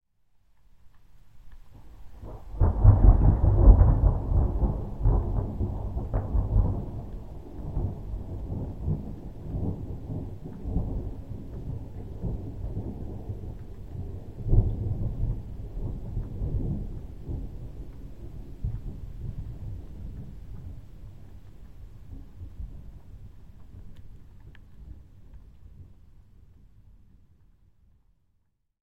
SonicBoomThunderFromCloudToCloudLightning20August2007
field-recording, lightning, nature, rain, rainstorm, storm, thunder, thunderstorm, weather, wind, windstorm